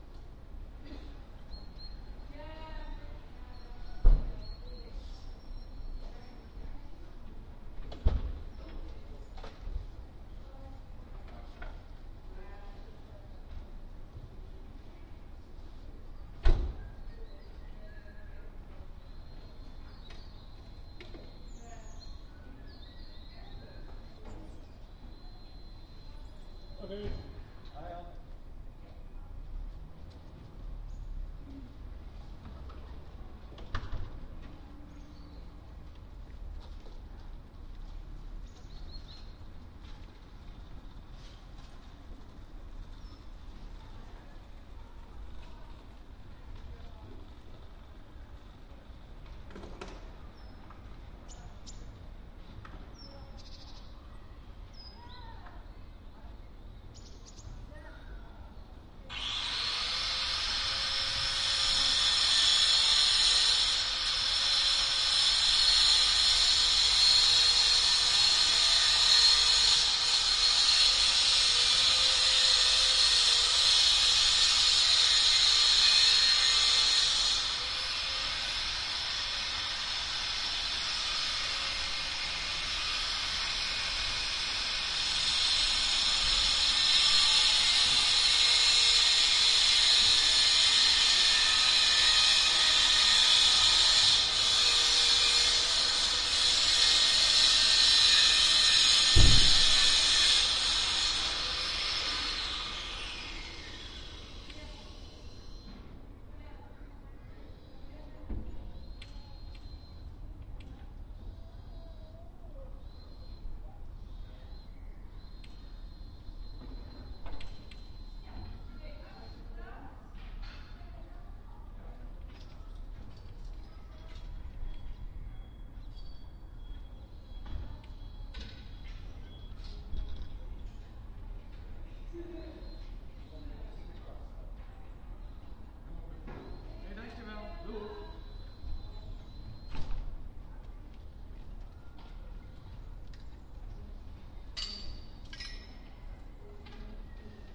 (un)peaceful Sunday
a renovating neighbor on a Sunday morning in Amsterdam.
EM172-> ULN-2-> TC SK48.
renovation, neighbors, voices, noise, birds, neighbour, city, talking, street, Dutch, the-Netherlands, Amsterdam, neighbor, renovating